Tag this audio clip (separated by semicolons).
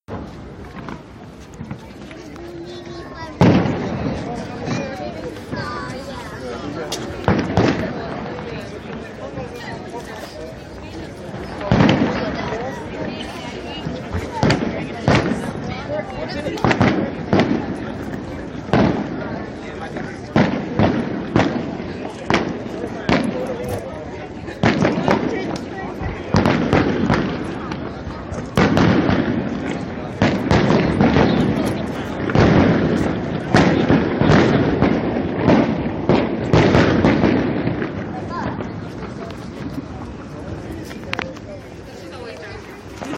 city; fireworks; loud; bang; pyrotechnics; walking; crowd; field-recording; gunshots; explosions